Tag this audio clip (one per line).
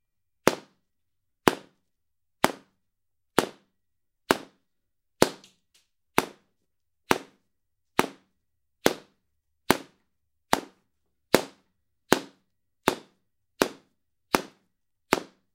foley
soundfx
combat
fight
soundeffects
sfx
fx
carpet
studio
switch
sound
fighting
whip
hit